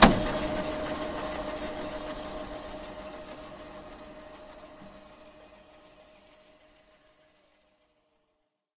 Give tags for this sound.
factory; mechanical; roll

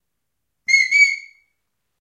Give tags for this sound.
xy; sheepdog; whistling; stereo

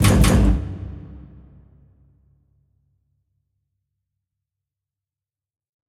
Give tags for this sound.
drum; elevator; enormous; gigantic; hit; impact; industrial; metal; metallic; percussion; percussive; processed; sample; strike; struck

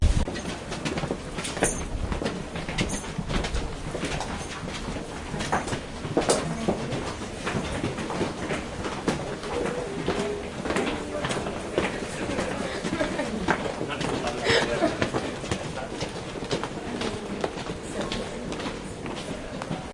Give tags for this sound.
subway; field-recording; metro; barcelona

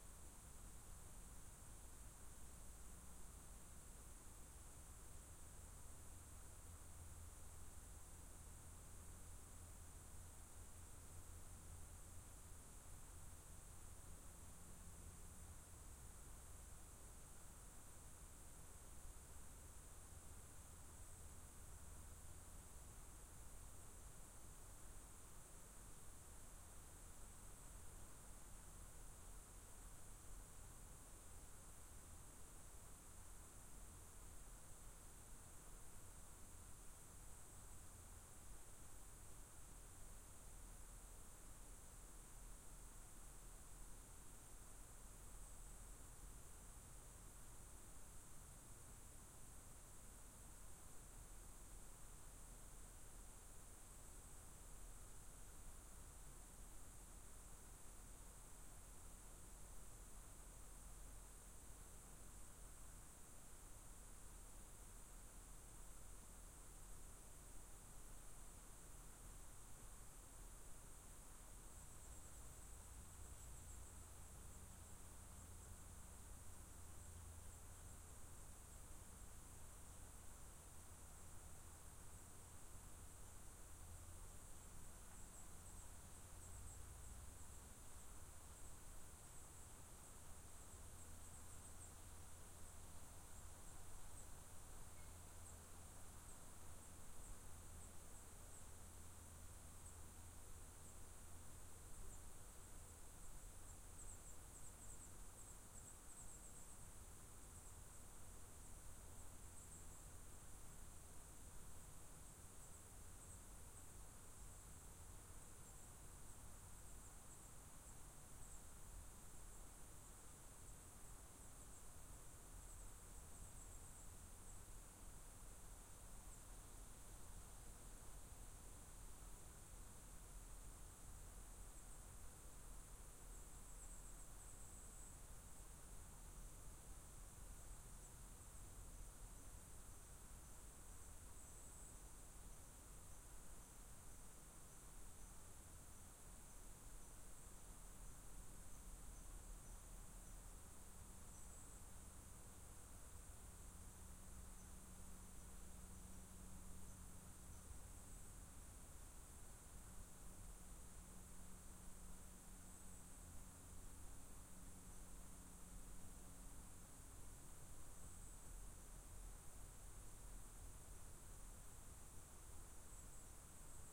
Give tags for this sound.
birds; forest

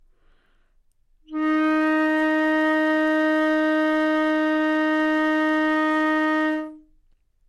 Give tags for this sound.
Dsharp4; flute; multisample; neumann-U87; single-note; good-sounds

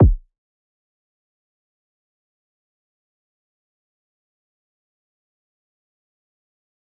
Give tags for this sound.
808; Deep; Electronic; EQ; Equalizing; FM; Frequency-Modulation; Hip-Hop; Kick; Layering; Low-Frequency; Sub; Synthesizer

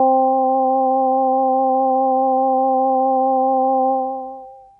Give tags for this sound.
Synth; Casio; 1000p; CT; Vintage

